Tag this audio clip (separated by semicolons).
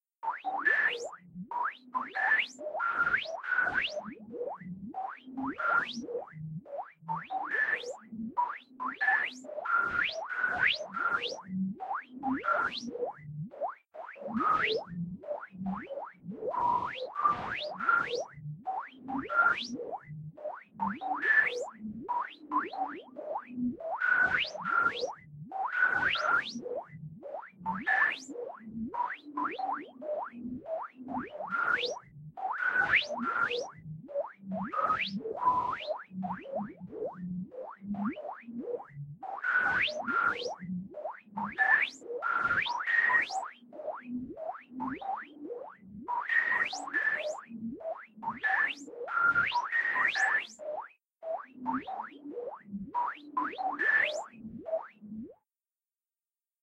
modern; techno; synth; electronika; loop; music